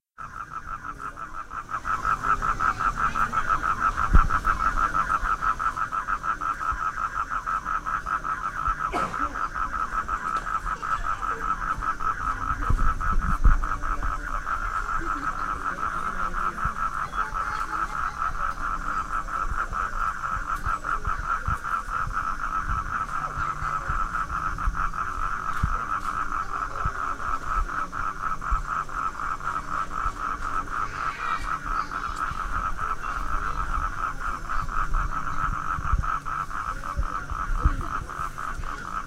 Recorded in Bangkok, Chiang Mai, KaPhangan, Thathon, Mae Salong ... with a microphone on minidisc
temples, street, machines, thailand